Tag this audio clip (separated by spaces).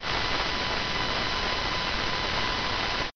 silence fake bruit